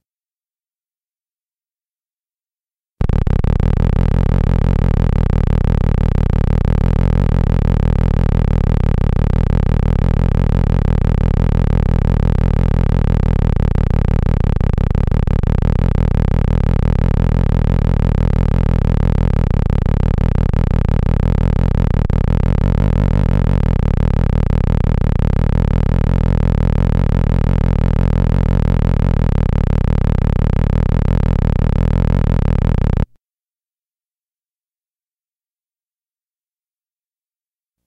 02 LowOsc Rough
This is a 30-second long steady oscillation using the lowest end of the theremin. Tonal and wave form settings were set for a "grainy/sawtooth" feel. Great for creating a rumbling, ugly oscillations, psycho sounds. Twist and tweak it and bend it to your will!
Every effort has been made to eliminate/reduce hum and distortion (unless intentionally noted).